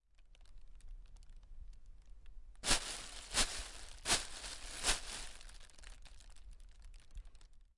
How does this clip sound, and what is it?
hitting the bag. Whu amm i doing that?...